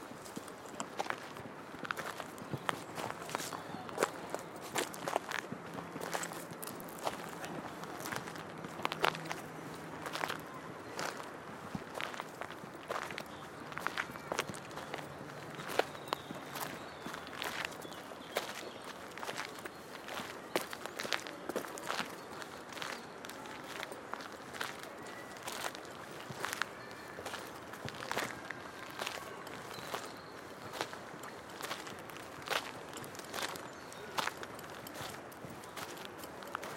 FX - pasos sobre gravilla, hierba y tierra